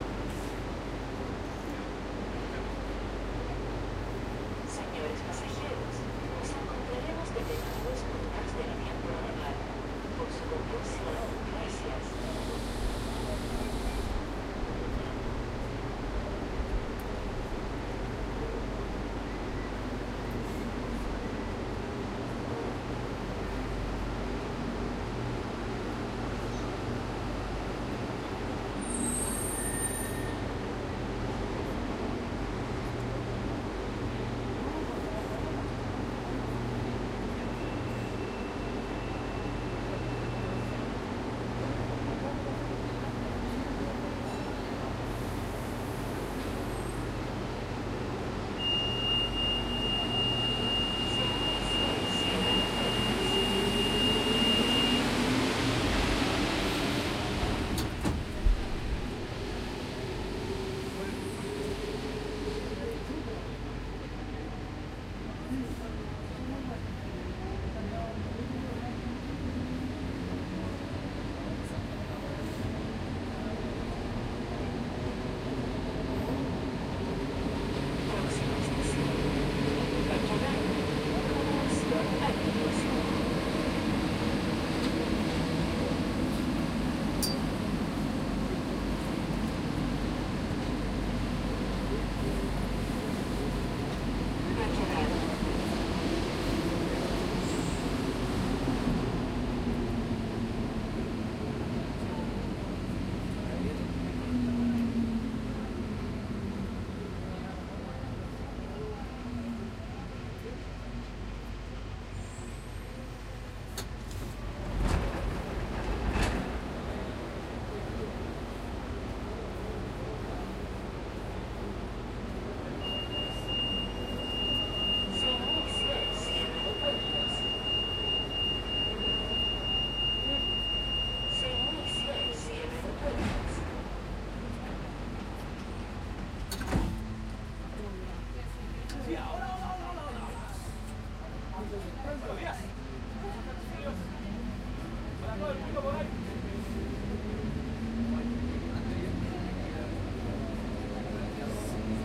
Santiago(Chile) Subway Line 1 from car between U. de Chile and Baquedano (amb).
ambiente metro de Santiago L1 desde vagon
Santiago subway metro ambience from inside of the car downtown trip Line 1 (red line)
baquedano, downtown, ambiente, metro, 1, america, roja, santiago, line, south, subway, chile, linea, ambience, red